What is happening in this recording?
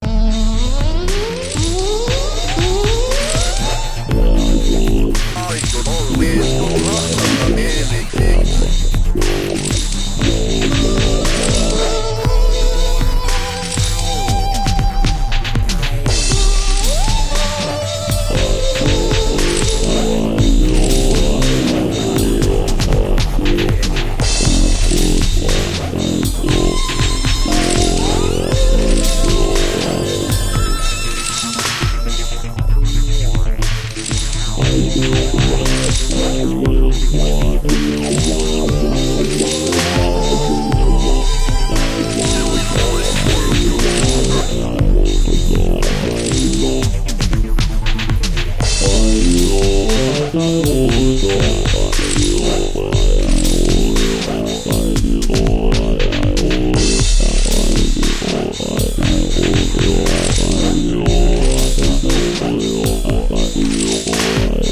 Techno Electro Music Loop 118 BPM Bass Synth Drums Robot Voice
Electro, Techno, 118, Synth, Bass, Loop, BPM, Drums, Music, Robot, Voice